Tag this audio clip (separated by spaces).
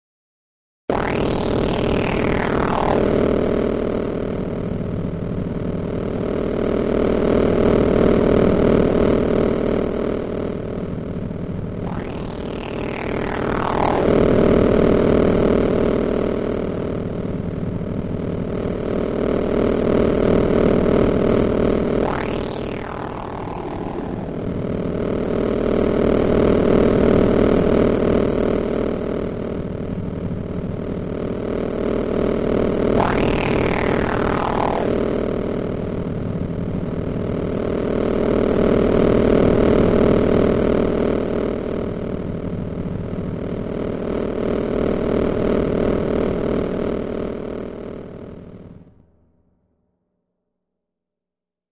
Machinery Synthetic